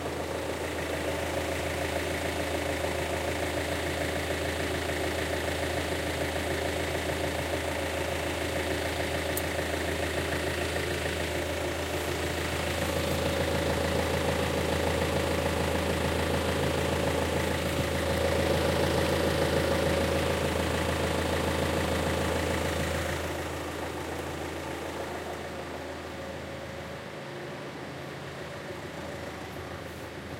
20061030.car.idling.bin
idling motor of a diesel Suzuki Vitara, binaural
binaural, car, diesel, idling, motor